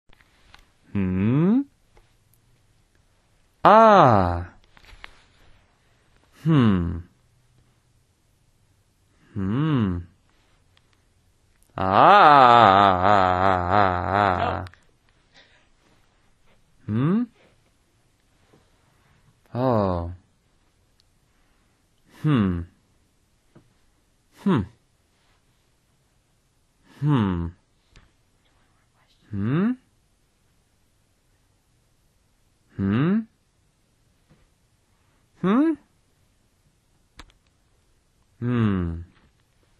Hmm Ahh
A young man saying "Hmm" and "Ahh" several times with different inflections
male, man, ah, hm, ahh, ahhh, hmmm, hmm, question